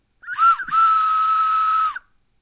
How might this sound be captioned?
it's just a train whistle.
train, whistle